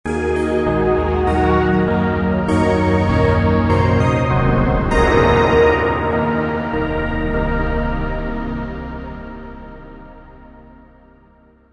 eventsounds1 - intros b
application, bleep, blip, bootup, click, clicks, desktop, effect, event, game, intro, intros, sfx, sound, startup